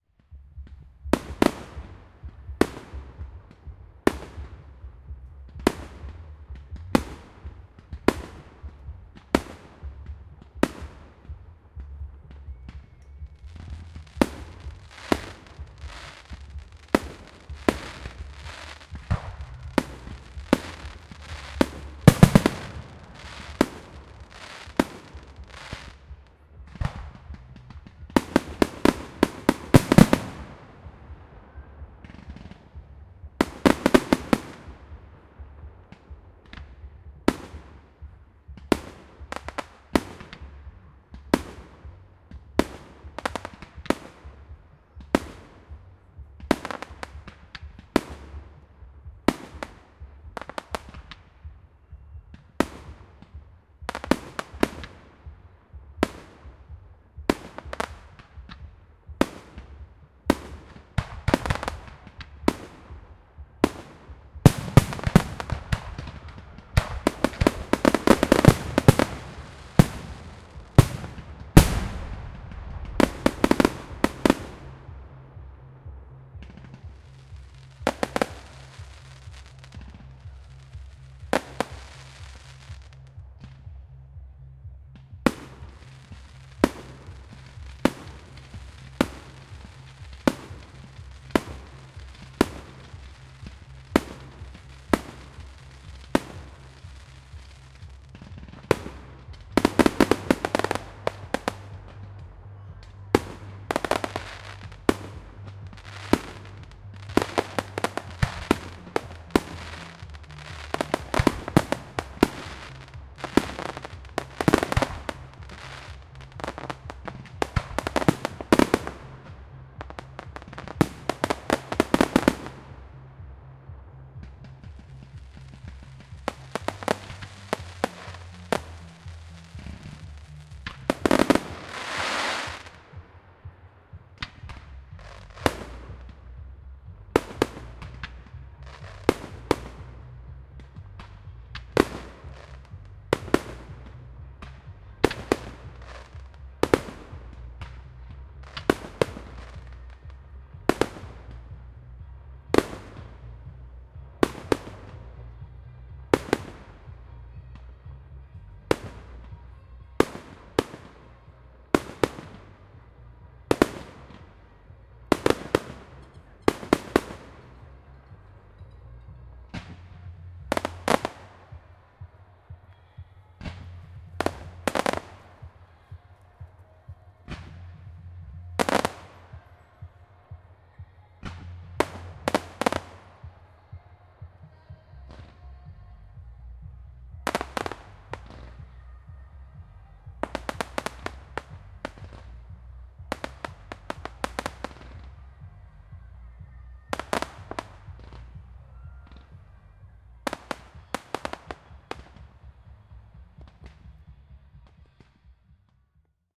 Fireworks, Close, B (H4n)
Raw audio of a fireworks display at Godalming, England. I recorded this event simultaneously with a Zoom H1 and Zoom H4n Pro to compare the quality. Annoyingly, the organizers also blasted music during the event, so the moments of quiet are tainted with distant, though obscured music. Crackling fireworks can be heard.
An example of how you might credit is by putting this in the description/credits:
The sound was recorded using a "H4n Pro Zoom recorder" on 3rd November 2017.
bonfire, display, explosion, fawkes, firework, fireworks, new, new-year, year